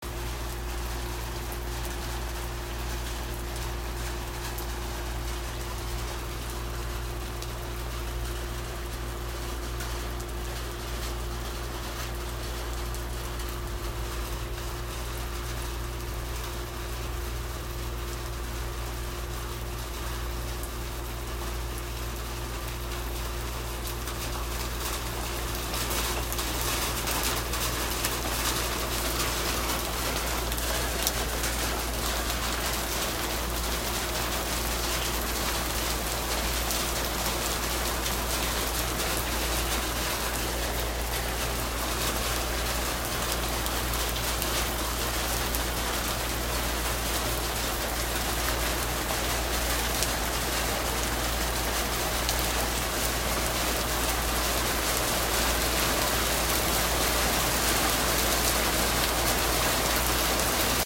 Rain Hitting Metal
Rain hitting he metal storage thing in the backyard.
rain, rainfall, raining, weather